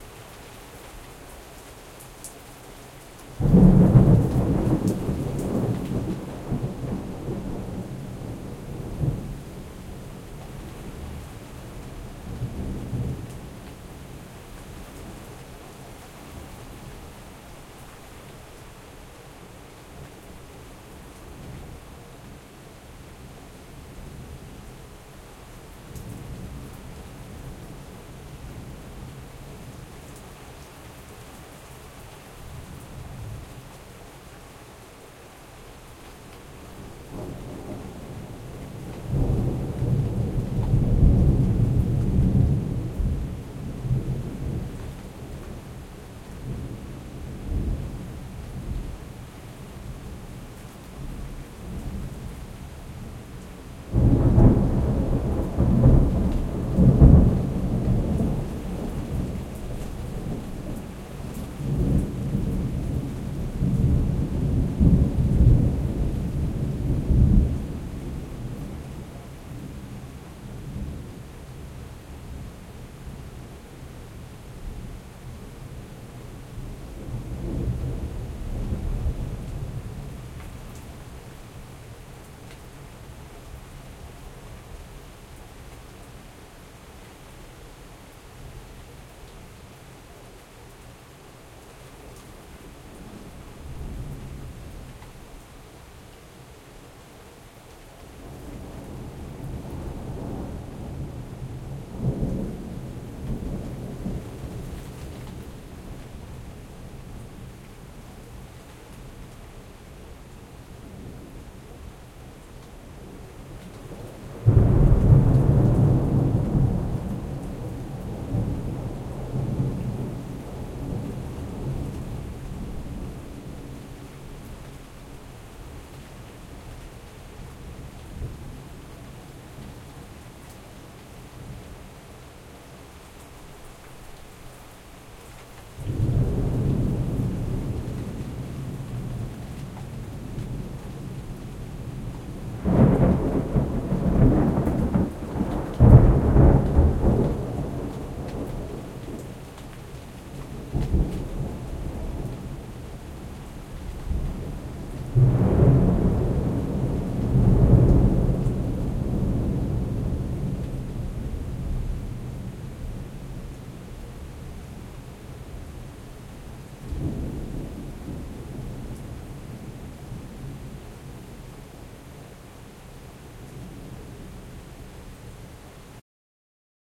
Weather sounds recorded in Tampere, Finland 2012. Rain, thunder, winds. Recorded with Zoom H4n & pair of Oktava Mk012.